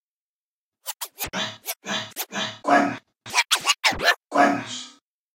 Acid-sized sample of a scratch made by me with the mouse in 1999 or 2000. Baby scratch. Ready for drag'n'drop music production software.
I recommend you that, if you are going to use it in a track with a different BPM, you change the speed of this sample (like modifying the pitch in a turntable), not just the duration keeping the tone.
Software: AnalogX Scratch & Cool Edit Pro 2.1
Sound: recording of my own voice with postproduced echo